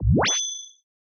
anime sword shing synth 2
Recently I was trying to create some "anime sword" like sounds for company's project. When I searched on the Google for tutorial the results disappointed me. The approach of very few tutorial is by manipulating recorded samples, usually by using metal hit sounds. It's fine but all of them have big and long reverb tails which I don't need. So I tried different approcah by using FM synthesis method, the result is great for my specific project. I uploaded here for your free use. You can layer sword sound at the beginning and use some reverb plugin to make it sound more "classical"